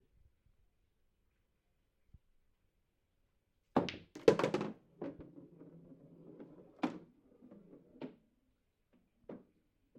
This is of a person hitting a single ball on a pool/snooker/billiards table with their wooden cue and the sound it makes when the two balls collide.